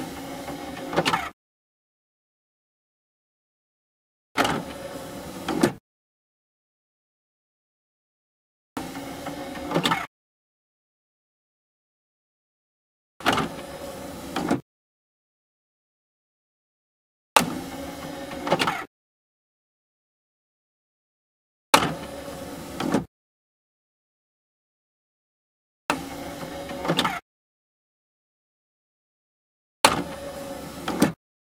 DVD Tray Open/Close